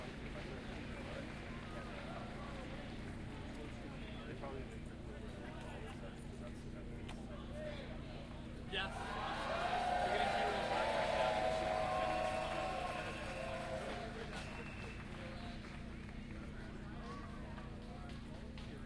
072510 distant cheer
Stereo binaural field recording of a crowd cheering in the distance.